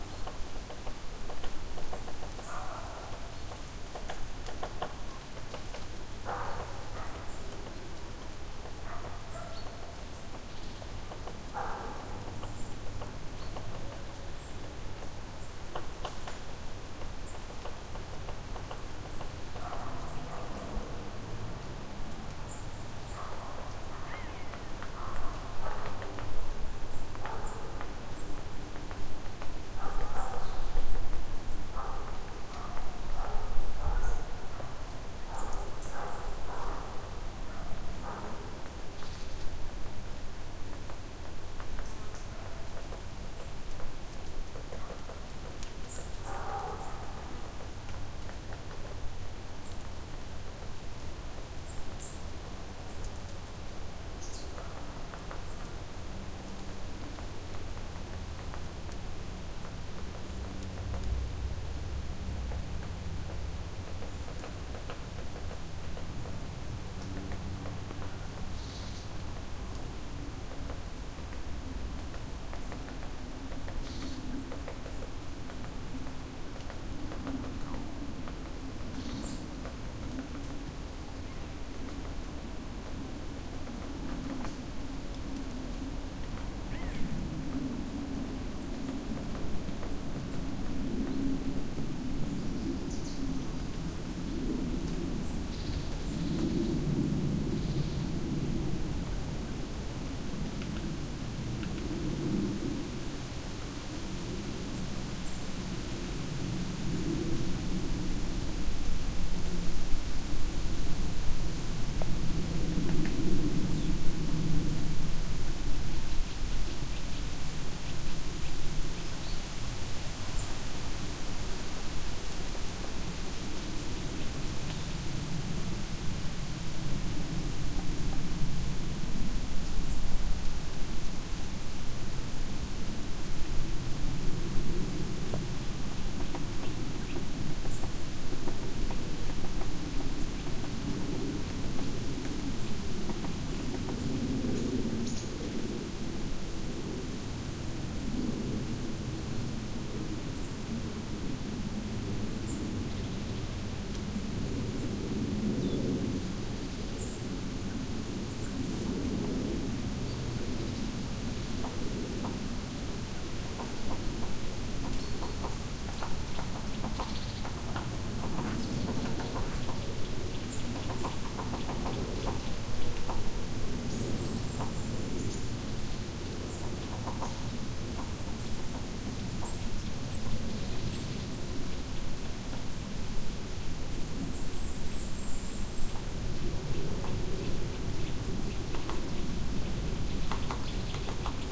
woodpecker, wind and dogs
bark, dogs, field-recording, forest, woodpecker